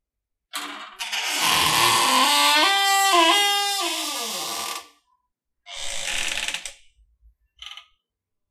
Opening wooded door sound